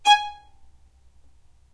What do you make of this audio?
violin spiccato G#4
spiccato, violin